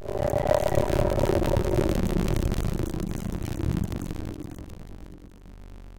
Heavily altered combination of ERH's Ghost_1 and Call.